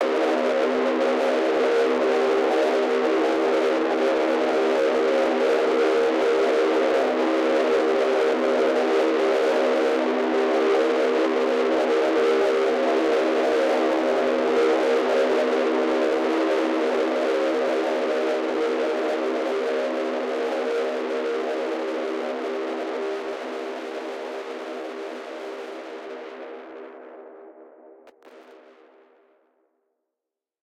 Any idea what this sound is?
Distorted Elemnts 05
various recordings and soundfiles -> distorted -> ableton corpus -> amp
amp, distorted